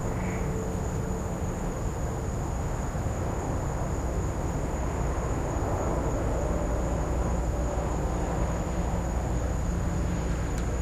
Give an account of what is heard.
The frogs and insects at night recorded with Olympus DS-40 with Sony ECMDS70P.
field-recording, frogs, insects